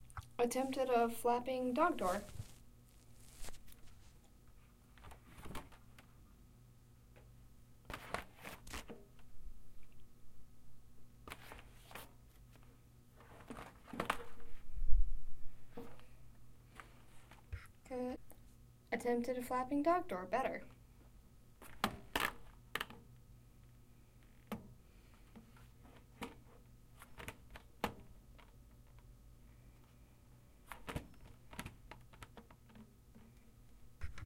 closing-door thump shut